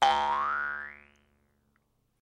Jaw harp sound
Recorded using an SM58, Tascam US-1641 and Logic Pro